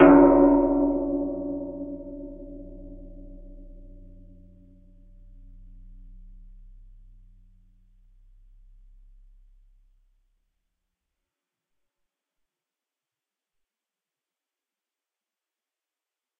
Gong - percussion 11 03
Gong from a collection of various sized gongs
Studio Recording
Rode NT1000
AKG C1000s
Clock Audio C 009E-RF Boundary Microphone
Reaper DAW
bell, chinese, clang, drum, gong, hit, iron, metal, metallic, percussion, percussive, ring, steel, temple, ting